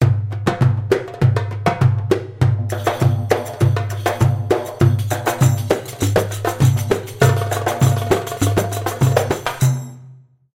Ayoub-Zar 100
diffrent type of Percussion instrument of darbouka :
ayyoub/darij/fellahi/malfuf/masmudi-kibir/masmudi-sagir/rumba-.../Churchuna/Dabkkah/Daza/